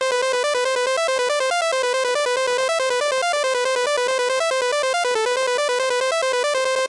Sivdin - Lead 140 BPM
Lead of Sivdin loop 140bpm
140bpm, c64, chiptune, commodore, commodore-64, lead, minisid, sid, sid-chip, square